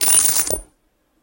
0014 Bottom Studs
Recordings of the Alexander Wang luxury handbag called the Rocco. Bottom studs
Alexander-Wang, Handbag, Hardware, Leather